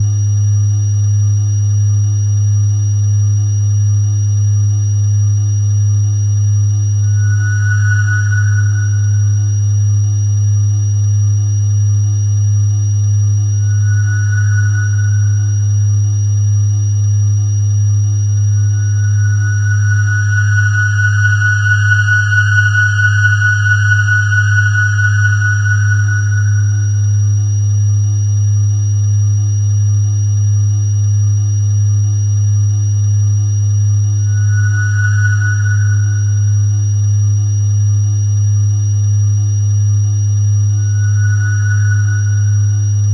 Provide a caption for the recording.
scaryscape hypertensive
a collection of sinister, granular synthesized sounds, designed to be used in a cinematic way.
abstract, alien, ambience, ambient, atmosphere, bad, bakground, cinematic, creepy, criminal, dark, drama, drone, effect, electro, experiment, fear, film, filter, granular, horror, illbient, lab, monster, movie, mutant, noise, pad, scary, sci-fi